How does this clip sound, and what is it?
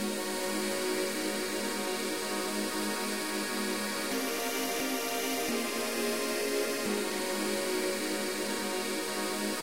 11 ca pad air
bright airy jungle
music, white-noise, horror, atmos, background-sound, atmosphere, atmospheric, soundscape, ambience, score, suspense, intro